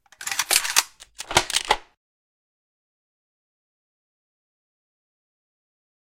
This was done for a play.